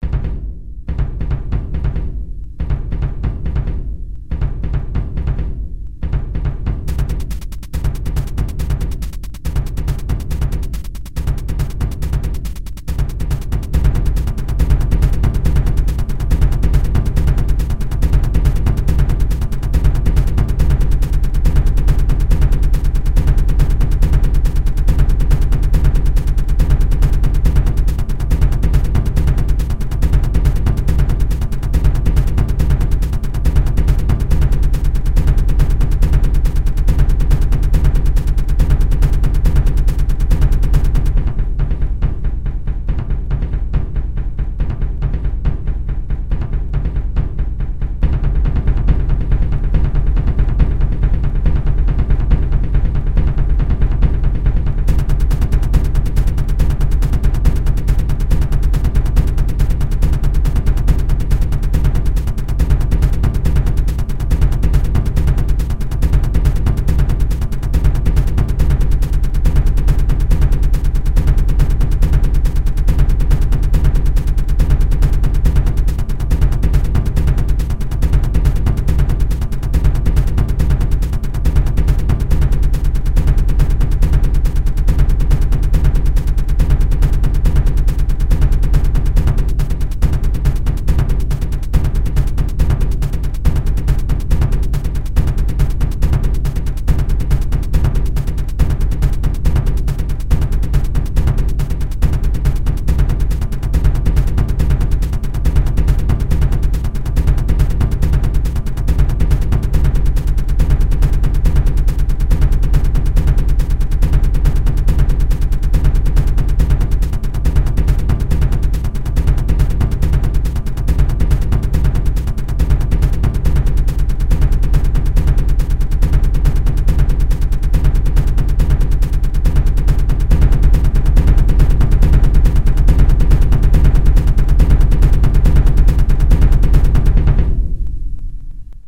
Music made 100% on LMMS Studio. Instruments: Tom, brushes and bassdrum acoustic.